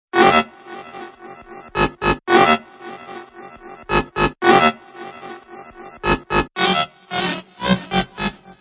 Sunny Day
A Ghost Type Sound made at 112bpm.
pad, scary, synth